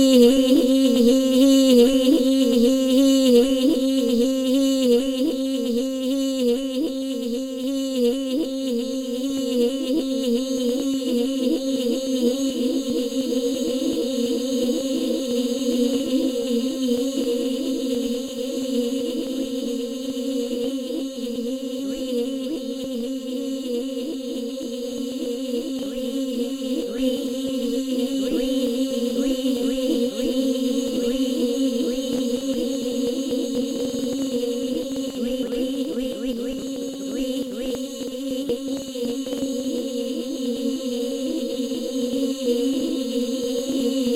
muovi-la-coda-e-prega
elena sennheiser voice treated with various floss audiotools, mostly pd.